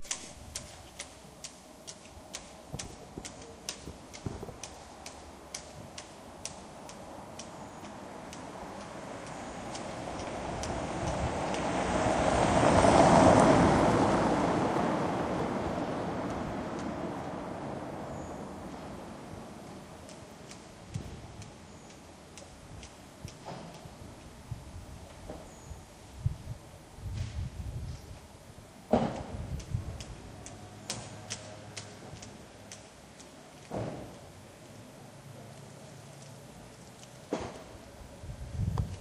WS 30142 politiestation vlaggemast 02
on the 5th of october 2009 i recorded in leiden, a small town in the netherlands a street with the sound of a rope constantly hitting a flagpole. the noise stops slightly as if it is scared away by the passing car. then it starts again. recorded with my small olympus but with a fairly good sony microphone.
quiet, rope, one, car, street, town, flagpole, small